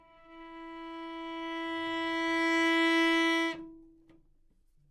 Cello - E4 - bad-dynamics
Part of the Good-sounds dataset of monophonic instrumental sounds.
instrument::cello
note::E
octave::4
midi note::52
good-sounds-id::4395
Intentionally played as an example of bad-dynamics
E4, cello, good-sounds, multisample, neumann-U87, single-note